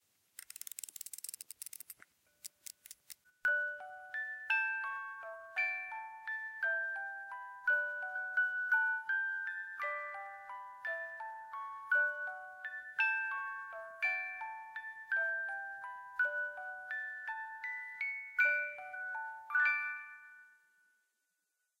An Edelweiss music box from Germany playing.
edelweiss, music, chime, box, crank, tinkle, wind, wind-up